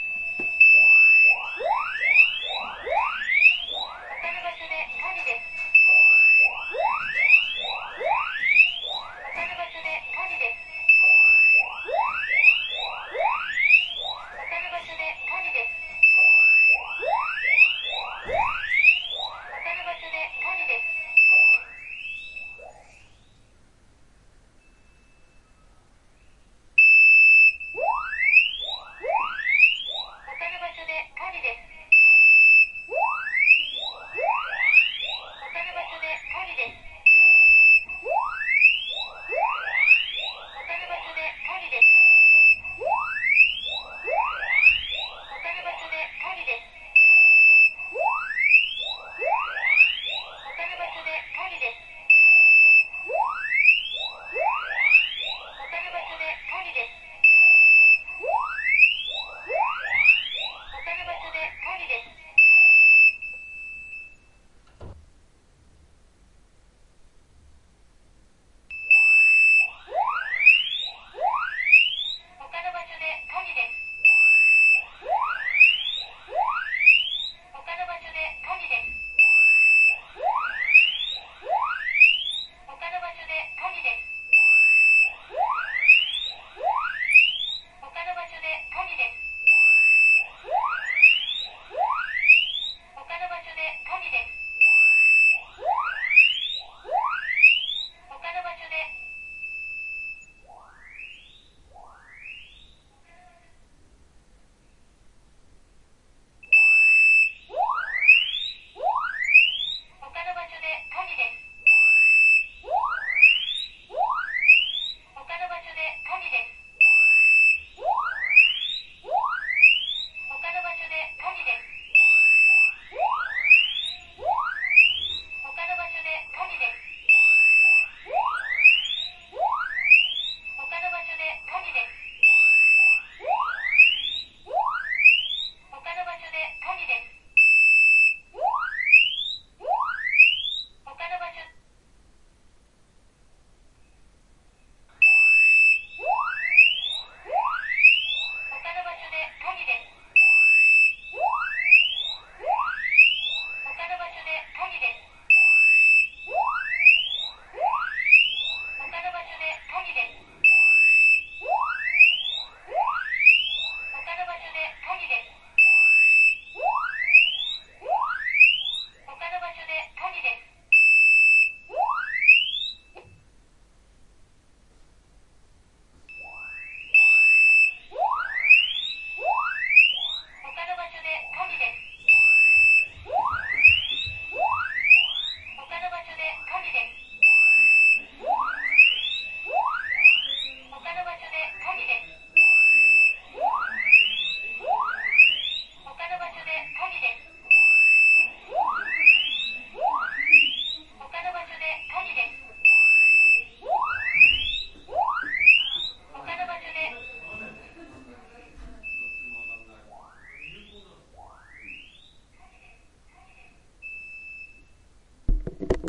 Checking fire alarm syetem in my home. It says in Japanese, “Fire! Fire! Another room is on fire”.